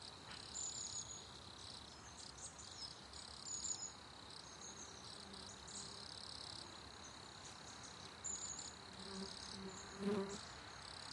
Cricket Fly
Microphone: Rode NT4 (Stereo)
Ambience, Birds, Bruere-Allichamps, Cher-River, Crickets, Field-Recording, Fly, France